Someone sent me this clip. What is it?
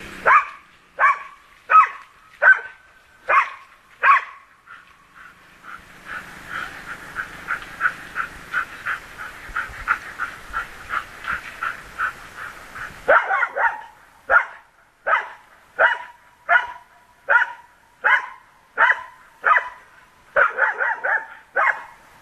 echo barking dog020710
02.07.2010: about 19.00 Sobieszow on the forest road Gen. Bema(Sobieszow-Jelenia Gora in the Low Silesia region)
the echoing sound of the barking dog.
barking, sobieszow, dog, poland, field-recording, jelenia-gora, forest, echo